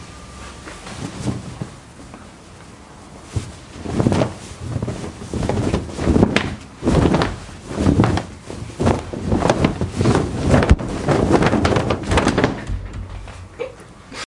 Towel Flapping OWI
Recorded with rifle mic. A Towel being flapped in the air.
flapping, OWI